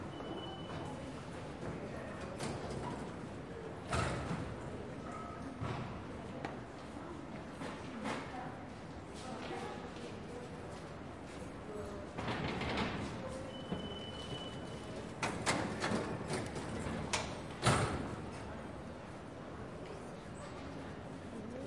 Sonicsnaps-OM-FR-tourniquet-metro
The ticket style in the Paris metro
snaps, TCR